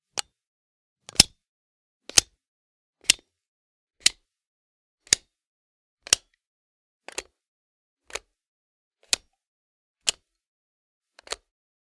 Variations of an electric blanket switch jumping between numbers.